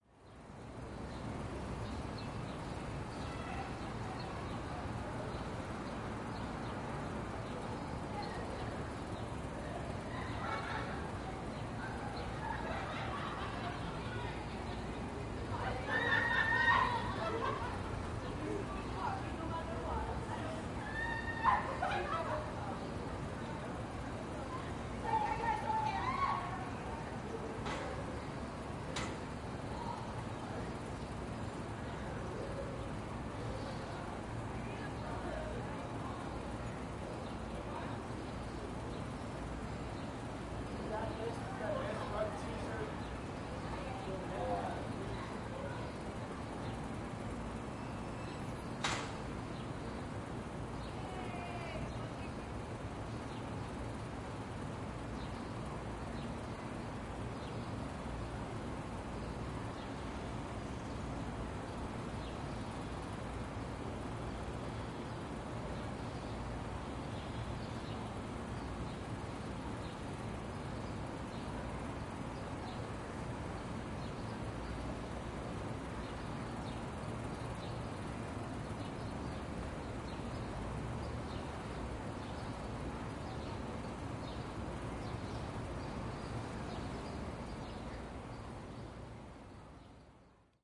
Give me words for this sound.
South Beach Soundscape 1
A brief cityscape recorded with a Tascam DR-05 at 44.1/24 outside my hotel window in Miami's South Beach area.
ambience, noise